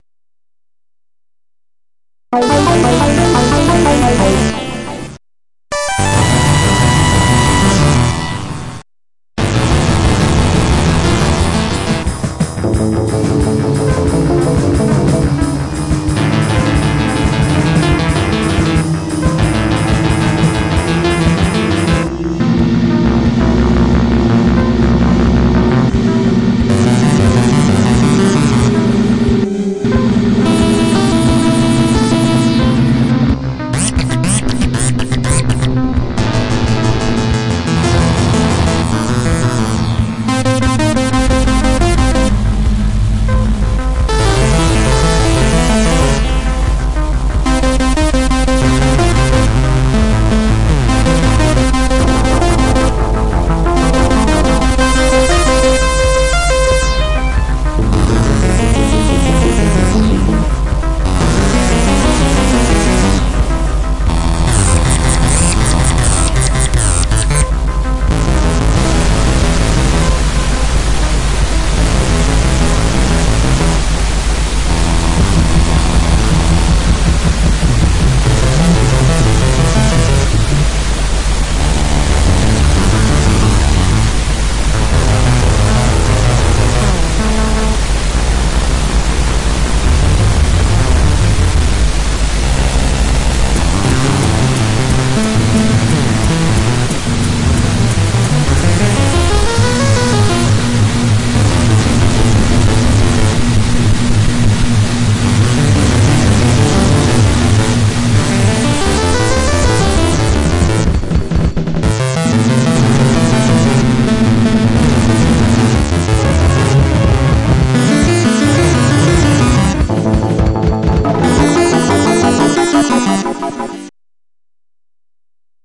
Sequences loops and melodic elements made with image synth.